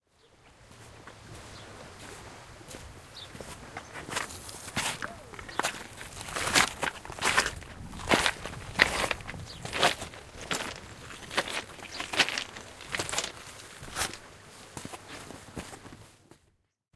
Pebbles On Flat Beach
Walking over some pebbles laid down to stop erosion as I was leaving a beach. This recording is from the sand, over the pebbles and up some concrete steps. In the distance a small boat is motoring.
crunch, foot-steps, clink, walking, rocks, clunky, pebbles, clunk, gravel